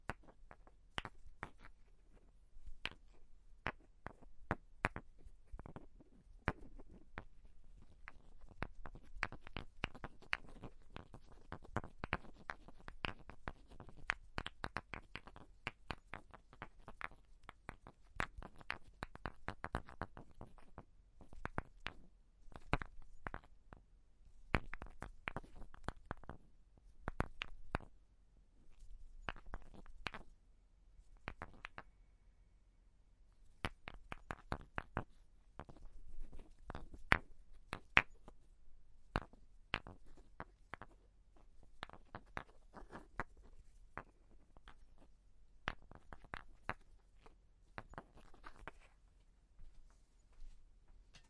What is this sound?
wood balls handling
Medium to small sized wooden balls handled in hands.